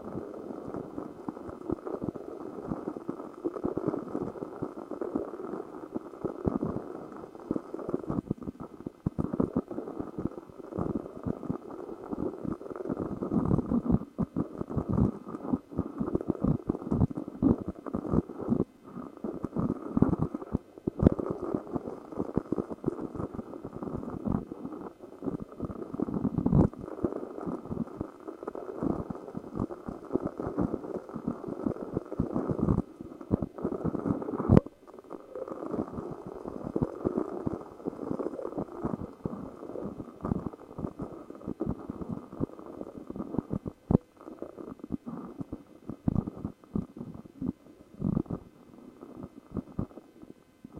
Simply rotating a small pepper mill
home, kitchen, cooking, food